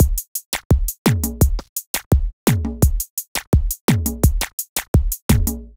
Wheaky 2 - 85BPM
A wheaky drum loop perfect for modern zouk music. Made with FL Studio (85 BPM).
beat, loop, drum, zouk